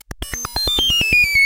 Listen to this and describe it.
Digital glitch 057
Little beep mellody.
Created using a VST instrument called NoizDumpster, by The Lower Rhythm.
Might be useful as special effects on retro style games or in glitch music an similar genres.
You can find NoizDumpster here:
artificial, beep, beeping, computer, digital, electronic, glitch, harsh, lo-fi, noise, NoizDumpster, TheLowerRhythm, TLR, VST